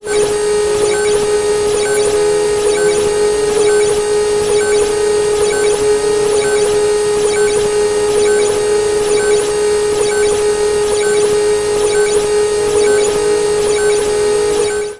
Odd machine
Machinery sound. Processed. Made on a Waldorf Q rack